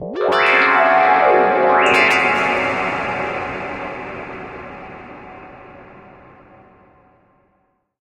Fresh SFX for game project.
Software: Reaktor.
Just download and use. It's absolutely free!
Best Wishes to all independent developers.